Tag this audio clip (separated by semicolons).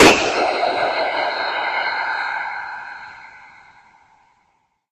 50-users-50-days; artificial; cognito-perceptu; human; motion; odd; sfx; slomo; slow; slow-motion; spit; strange; weird